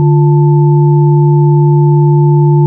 A sine chord that sounds like a organ
chord, sine